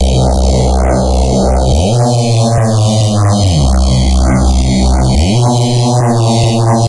An attempt to get some neurofunk bass. Inexperience.